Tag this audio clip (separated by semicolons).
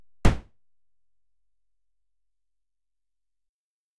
drum; electronic